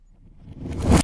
Recording session of different impacts reversed to build tension in animation. Very good for introducing a song or transition.
bang, boom, clang, clash, impact, reversic, riser